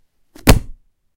Book Drop - 9
Dropping a book
bang, magazine, thud, closing, close, impact, slam, paper, book, newspaper, reading, read, library, drop, crash